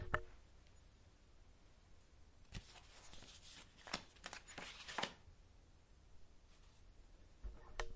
Opening a greetings card. In a hard surfaced area, close to mic.